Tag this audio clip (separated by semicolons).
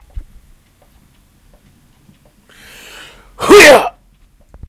cartoony; heyah; man; short; shout